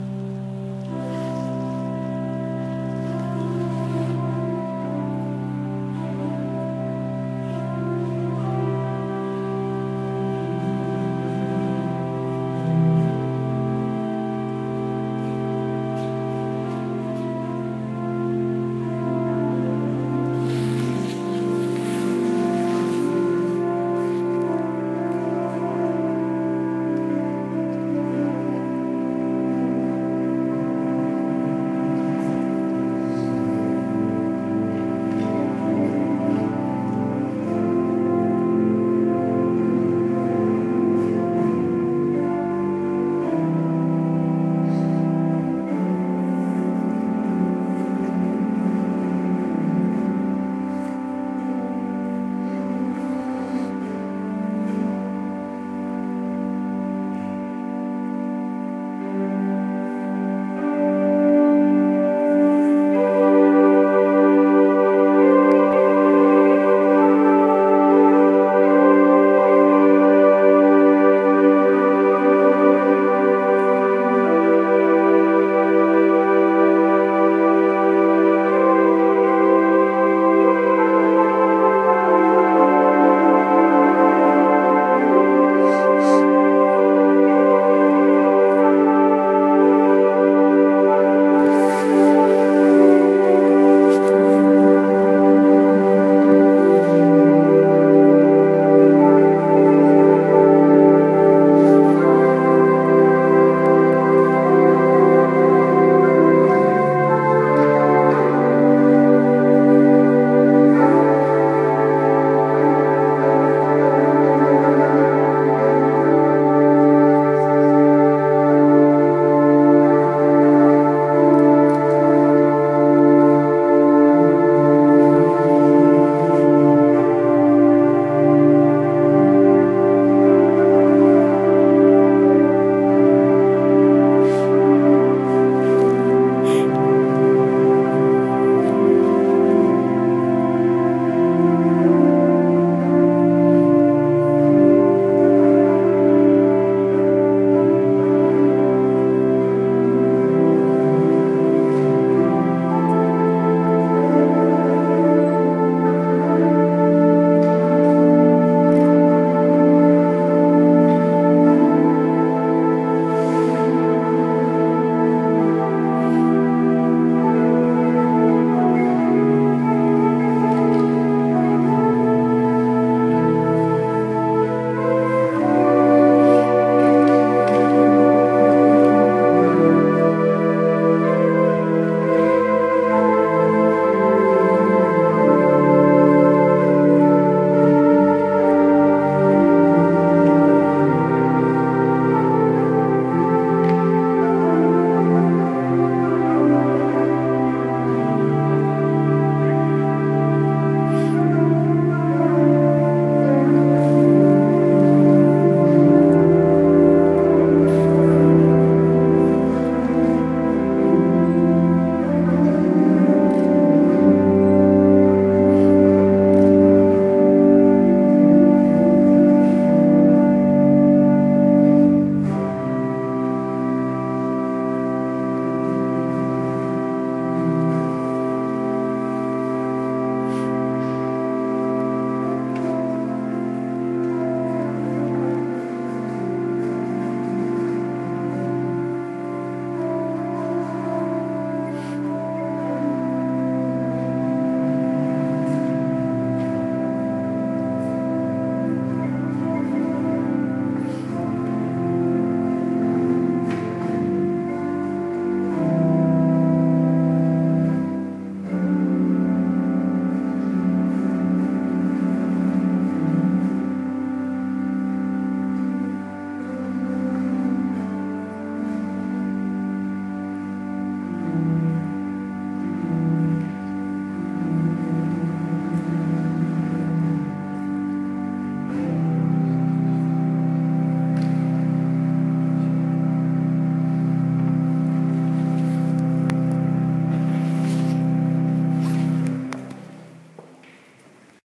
Loreta Organ
Live-recorded Organ performance in Loreta Prague Castle.
musician, music, organist, live-music, church, live, live-recording, organ, live-performance, performing